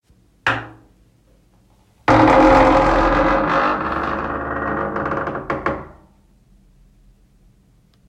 Wooden door squeak 2
Fieldrecording of a wooden bathroom door squeaking. Recorded using iPhone SE internal microphone
close, Door, open, Squeak, Wooden